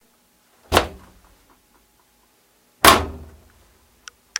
Open/Close a Washer/Dryer #2
Opening and closing a washer or dryer forcefully. The door is violently opened and slammed close.